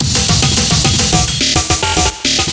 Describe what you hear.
loop amen rif meak in FL studio 10
with cybeles and break sample